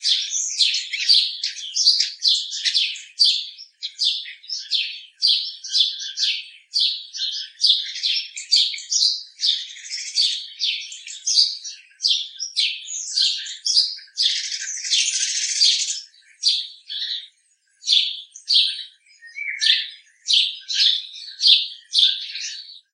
birds chirping
Birds, around forenoon.
ambiance, background, field-recording, spring